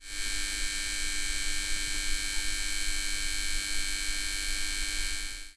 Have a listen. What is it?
Buzzing, Electric Lamp, A
Audio of an electric lamp bulb buzzing away. The recorder was held approximately 5cm away from the bulb. I have applied some EQ on the low end as I had to amplify this quite substantially for it to be audible.
An example of how you might credit is by putting this in the description/credits:
The sound was recorded using a "H6 (XY) Zoom recorder" on 11th January 2018.
bulb, buzz, buzzing, electric